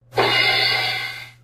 When I came to the strange decision to try recording my poems as songs I looked for ambience around the house. Just a simple smacking together